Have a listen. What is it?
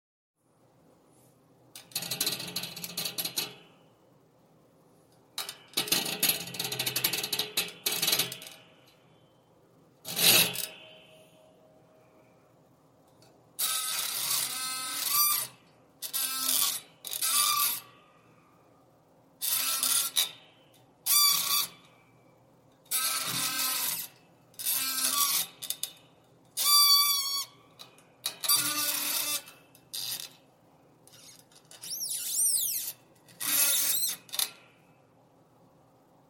Spring metal grind squeak
Sounds of metal squeaking grinding and a springy noise.
Have a great day!